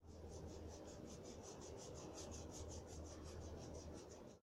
Sonido realizado para el final de la materia Audio 1, creado con foley, editado con reaper y grabado con Lg Magna c90
HouseSounds, Reaper